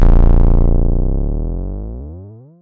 Here's a heap of snapshot samples of the Synare 3, a vintage analog drum synth circa 1980. They were recorded through an Avalon U5 and mackie mixer, and are completely dry. Theres percussion and alot of synth type sounds.